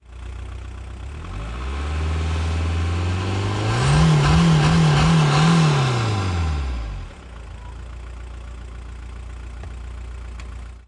Volkswagen Golf II 1.6 Diesel Exterior Engine Multiple Gas Pressing Mono
This sound effect was recorded with high quality sound equipment and comes from a sound library called Volkswagen Golf II 1.6 Diesel which is pack of 84 high quality audio files with a total length of 152 minutes. In this library you'll find various engine sounds recorded onboard and from exterior perspectives, along with foley and other sound effects.
car, cars, city, drive, engine, exterior, golf, idle, neutral, passby, street, vehicle, volkswagen